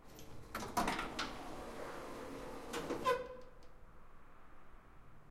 elevator door, city, Moscow
Elevator door opening, some ambience from outside the building.
Recorded via Tascam Dr-100mk2.
door, open, clank, lift, opening, elevator, whiz